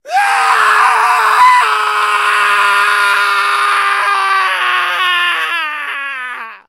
Long male scream 2
Long male scream expressing extreme pain or sadness.
Recorded with Zoom H4n
torture, scream, suffer, horror, male, dismember, torment, human, agony, sadness, long, pain